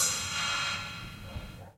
"808" drum sounds played through an Orange Amplifiers "Micro Crush" miniamp recorded for stereo ambiance in the original Batcave. These work well as drum layers under more conventional sounds, and in other creative ways. Recording assisted by Steve and Mikro.